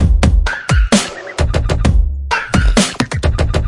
Hiphop/beats made with flstudio12/reaktor/omnisphere2